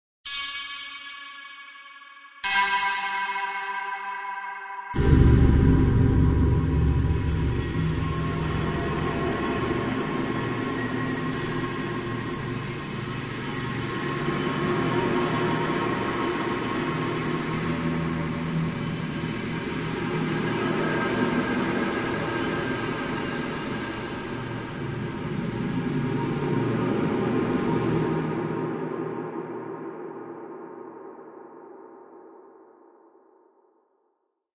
Ghostly Transition 4
A ghostly transition created with modal sound synthesis.
Recorded with Sony Sound Forge 10.
haunted, paranormal, terrific, ghost, hell, transition, drama, fear, nightmare, spectre, scary, ghostly, phantom, synthesis, horror, sound, demon, cinematic, sinister, evil, devil